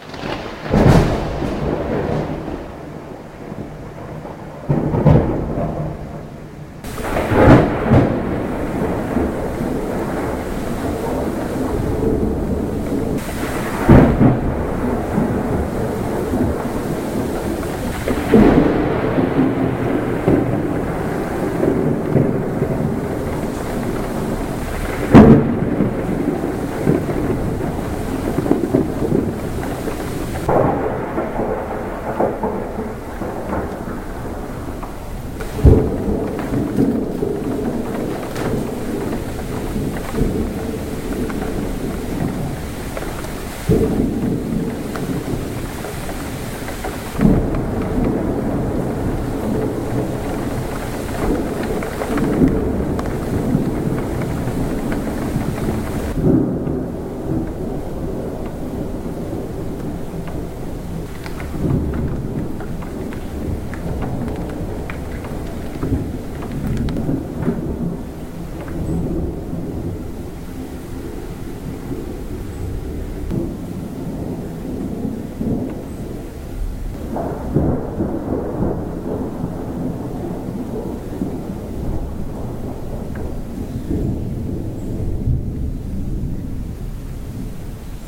Various thunder bolt noises, with different intensities.
storm, lightning, rain, thunder